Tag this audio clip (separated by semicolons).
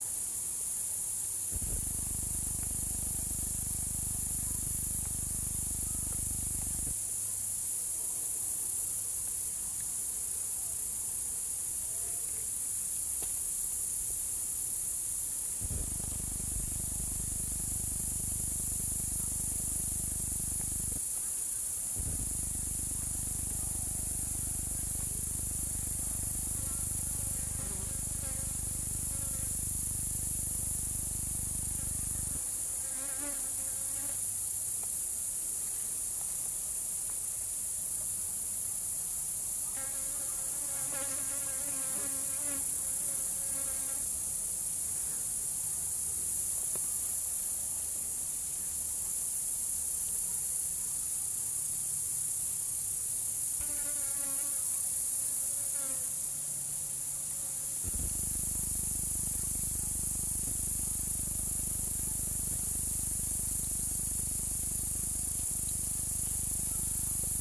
Ambience; flys; frogs; lake; marsh; nature; pond; swamp; wetlands